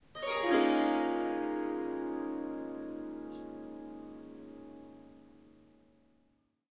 Harp Down Run with Birds
Melodic Snippets from recordings of me playing the Swar SanGam. This wonderful instrument is a combination of the Swarmandal and the Tampura. 15 harp strings and 4 Drone/Bass strings.
In these recordings I am only using the Swarmandal (Harp) part.
It is tuned to C sharp, but I have dropped the fourth note (F sharp) out of the scale.
There are four packs with lots of recordings in them, strums, plucks, short improvisations.
"Short melodic statements" are 1-2 bars. "Riffs" are 2-4 bars. "Melodies" are about 30 seconds and "Runs and Flutters" speaks for itself. There is recording of tuning up the Swarmandal in the melodies pack.
Indian, Riff, Strings, Swarmandal